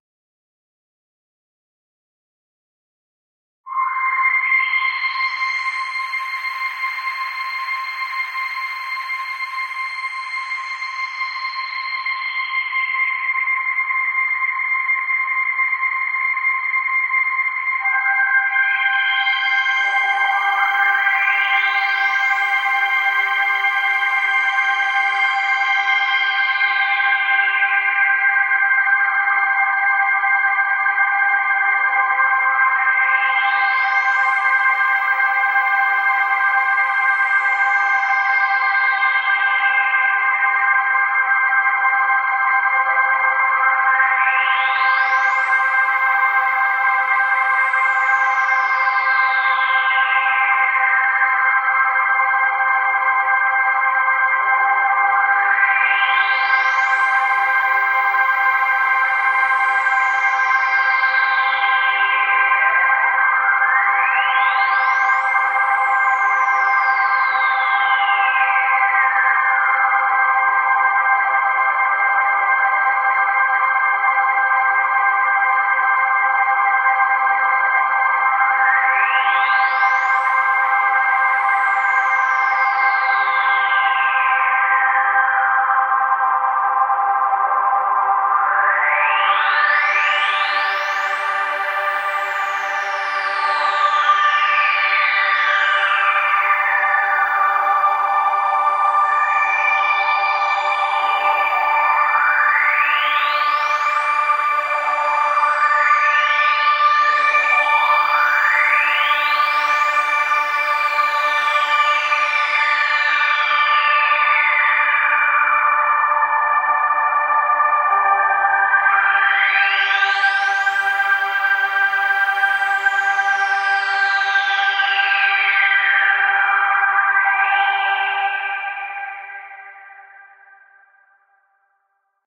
Playing my keyboard, slow notes. Used it for background for narration of a video about the universe.